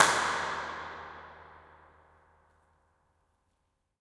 Train station tunnel Impulse-Response reverb 3m away high-pitched clap
3m, away, clap, high-pitched, Impulse-Response, reverb, station, Train, tunnel